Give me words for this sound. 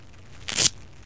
Velcro ripping sound.
velcro, rip, environmental-sounds-research